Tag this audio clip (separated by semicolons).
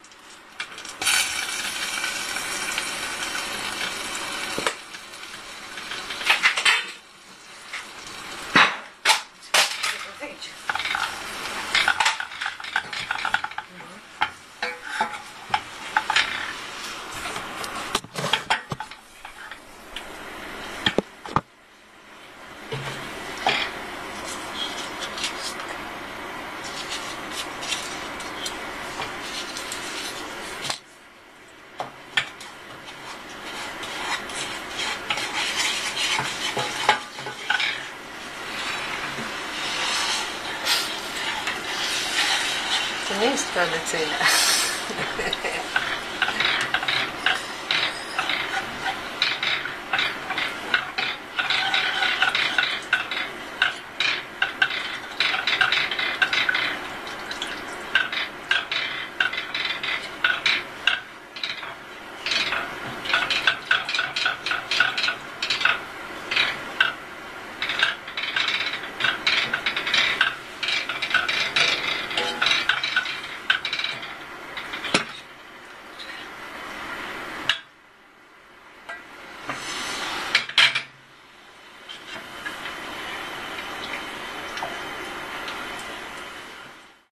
christmas; cooking; domestic-sounds; field-recording; kitchen; noise